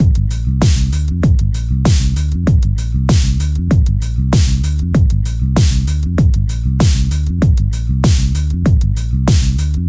PHAT Bass&DrumGroove Dm 22
My “PHATT” Bass&Drum; Grooves
Drums Made with my Roland JDXI, Bass With My Yamaha Bass
Ableton-Bass, Ableton-Loop, Bass, Bass-Groove, Bass-Loop, Bass-Recording, Beat, Compressor, Drums, Fender-PBass, Funk, Funky-Bass-Loop, Groove, Hip-Hop, Loop-Bass